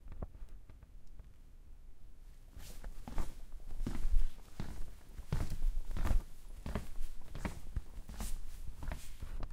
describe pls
Walking in sneakers on a laminate floor. There is a kind of swish to the footstep sound.